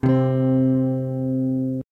Jackson Dominion guitar. Recorded through a POD XT Live, pedal. Bypass effects, on the Mid pickup setting.